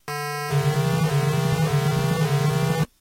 sample of gameboy with 32mb card and i kimu software
game, boy, layer